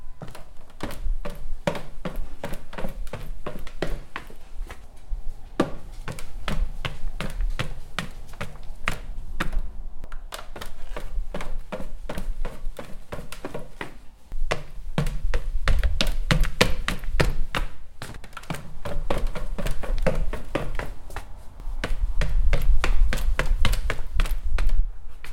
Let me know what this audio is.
Walking stairs with shoes: various speed of walking with heel shoes up and down some wood staris
feet, stairs, walking, staircase